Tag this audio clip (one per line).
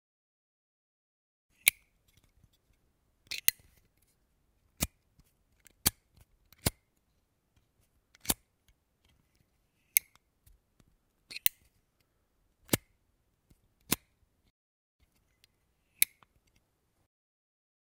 close-up
lighter
mechero
zippo